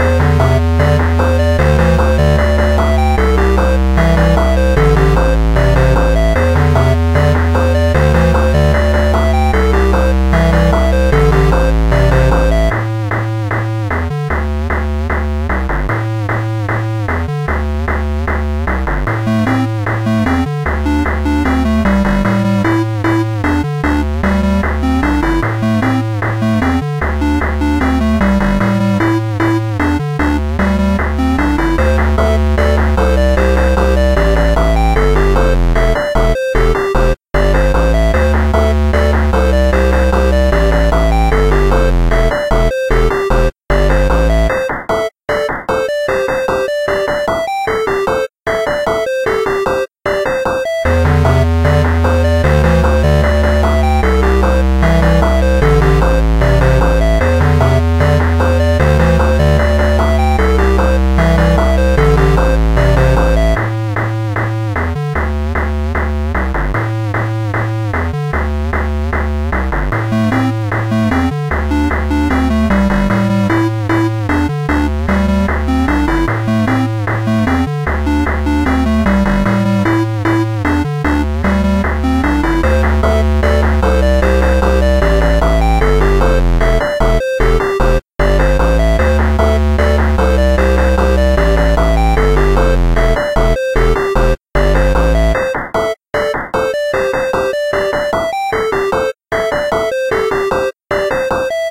In game
You can use this loop for any of your needs. Enjoy. Created in JummBox/BeepBox.
atari, music, melody, electronic, arcade, retro, sample, 8bit, gameboy, chiptune, electro, loop, video-game, soundtrack, game, 8-bit, background